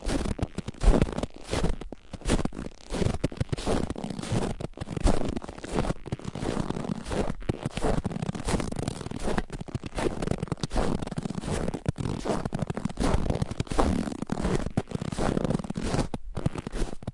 fotsteg på hård snö 7
Footsteps in hard snow. Recorded with Zoom H4.